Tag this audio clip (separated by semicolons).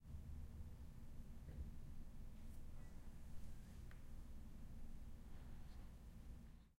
piano; piano-bench